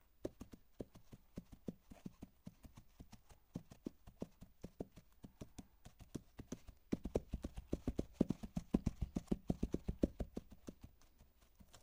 Hooves, Hard Muddy Surface / Layer 04
Microphone - Neumann U87 / Preamp - D&R / AD - MOTU
Coconut shells on a muddy, hard surface.
To be used as a part of a layer.
Layers, Hooves, Run, Group, Layer, Cow, Horse, Hoof, Buffalo